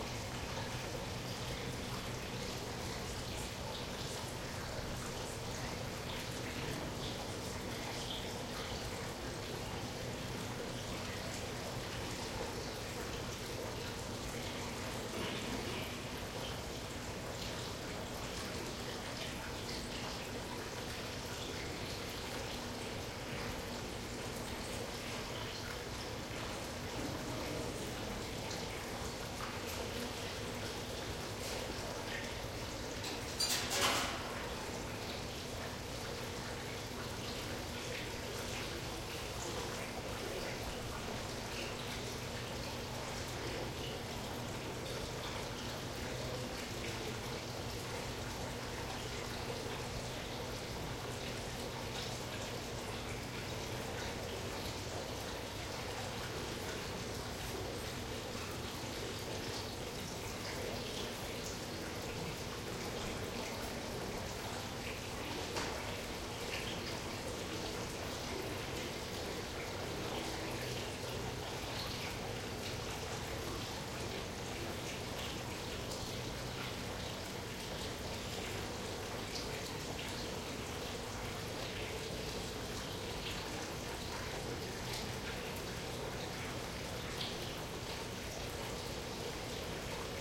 room tone medium with light ambient running water St Joseph Oratory Montreal, Canada
ambient, Canada, Joseph, light, medium, Montreal, Oratory, room, running, St, tone, water